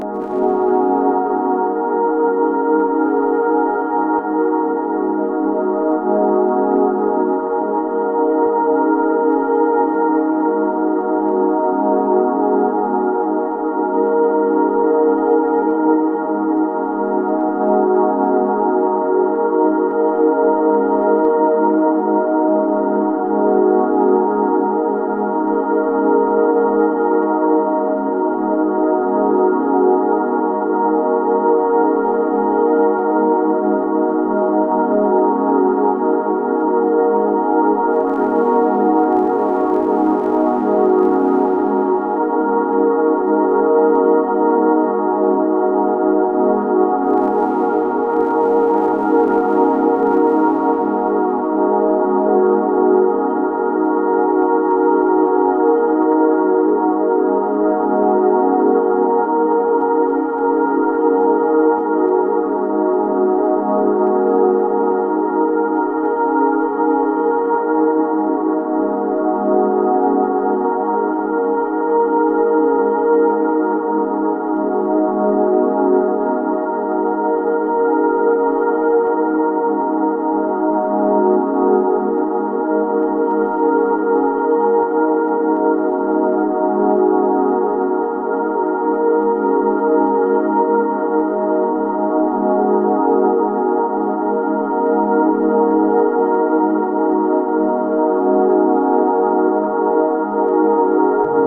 One in a series of strange ambient drones and glitches that once upon a time was a Rhodes piano.
ambient, drone, glitch, quiet, relaxing, rhodes, sound-design, synthesis